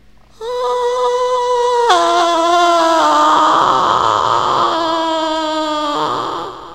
moan4 ECHO
moan 4 is a creepy sound with echo done with audiocity by Rose queen of scream. Just a basic scarey moan that is haunting.